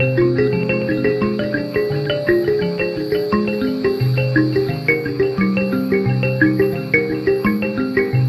Bells Loop
Dreamy Bells sequence with background insects and night sounds. Produced with Absynth 3, from Native Instruments.